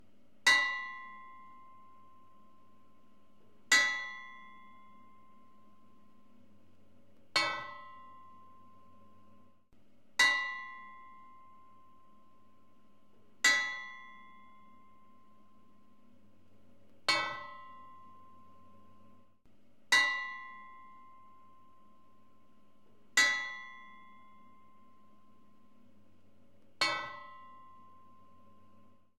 Tapping metal heavy ringing
Tapping heavy metal object. Ringing sound
Industrial, Metal, Metallic, Metalwork